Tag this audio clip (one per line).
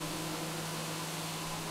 computer
machine
mechanical
whir